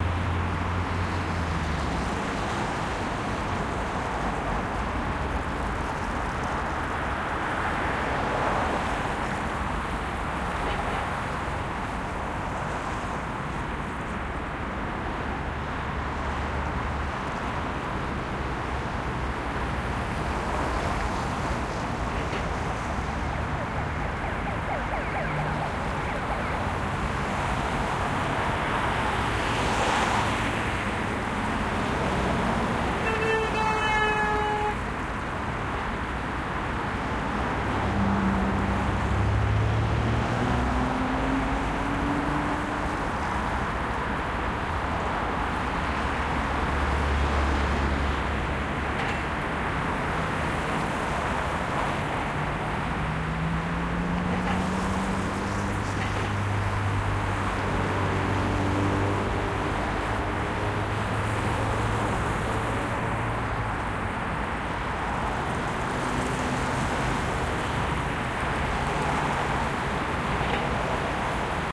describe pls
traffic on medium-close distance
Medium traffic recorded on medium-to-close distance. Some ambulance wails. Occasional metal clinks under wheels.
Recorded with pair of DPA4060 and SD MixPre-D in pseudo-binaural array
cars, moscow, road, russia, traffic